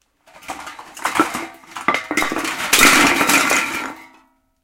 metallic cans on a cement floor